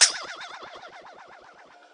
Springy Bounce
A light, springy, bouncy sound.
This sound is a modification from the sound "Impact Vibration".